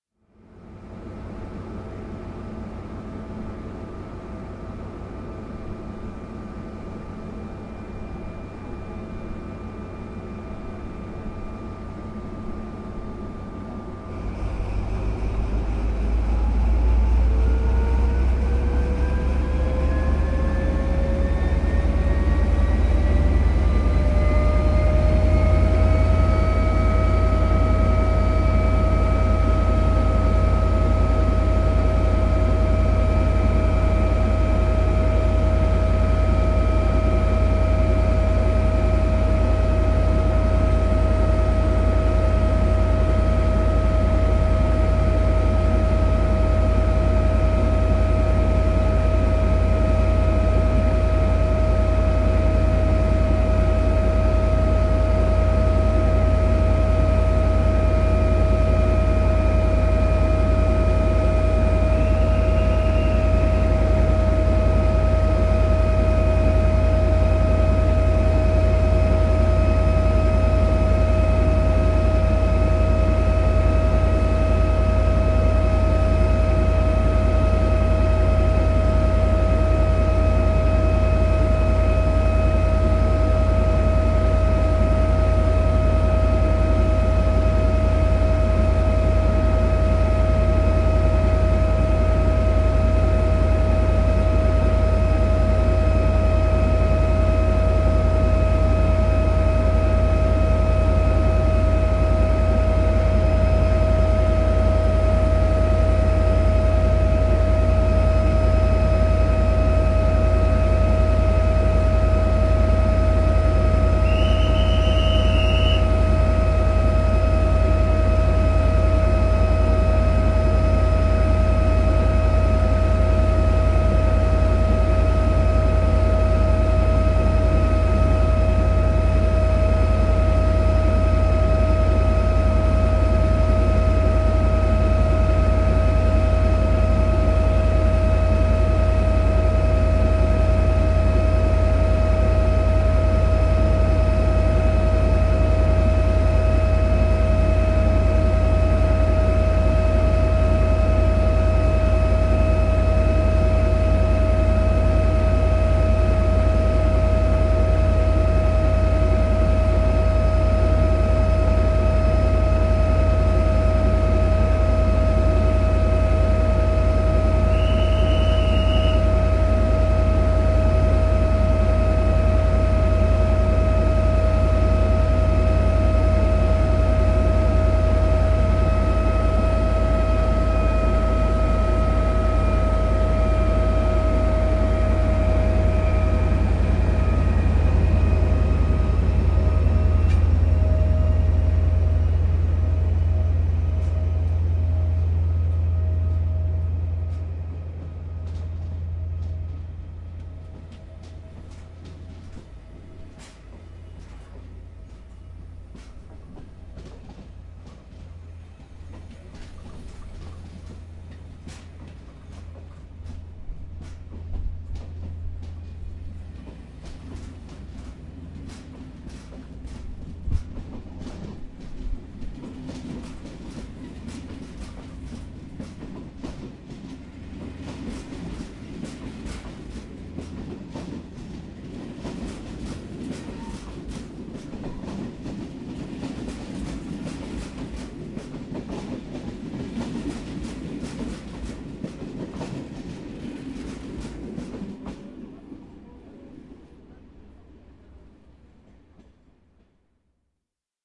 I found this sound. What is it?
Sample recorded with ZOOM H4 on the platform of Gare de l'Est in Paris.